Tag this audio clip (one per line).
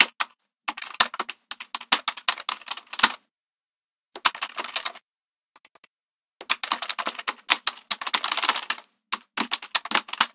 write
PC
keyboard
keystroke
typing